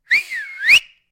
A whistle sound effect
lady, woot, wolf, whistle, hey, there